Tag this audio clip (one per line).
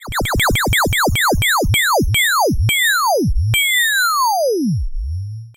decay
decaying
descending
effect
fading
FX
noise
pitch
pitchshift
soundeffect
synthesizer
VSTi